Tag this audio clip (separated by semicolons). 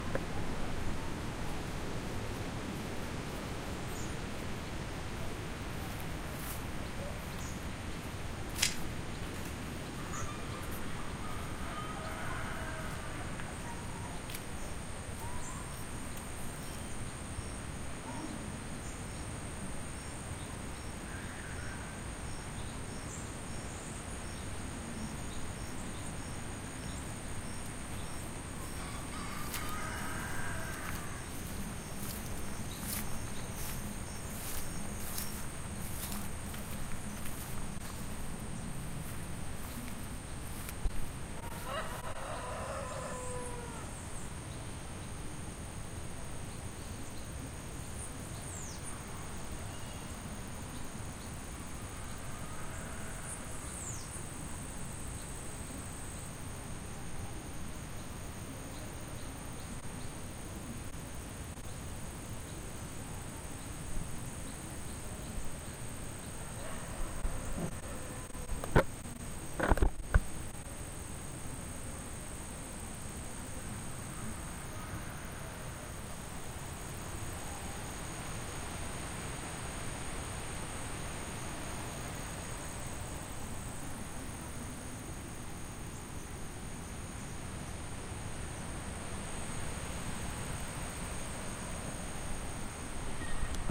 jungle; rainforest; mexico; farm